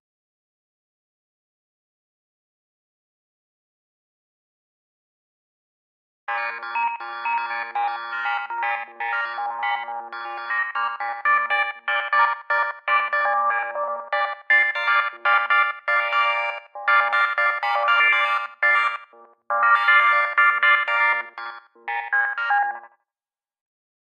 Electronic Pulses
Playing my keyboard, slow notes. Used it for background for narration of a video about the universe. With this sequence I portrayed the light travelling through the universe.
keyboard, pulsing, synth